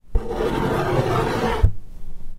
Box 25x25x7 thin ROAR 002
The box was about 35cm x 25cm x 7cm and made of thin corrugated cardboard.
These sounds were made by scrapping the the box with my nail.
They sound to me like a roar.
box, scratch, dare-9, nail, scrape, roar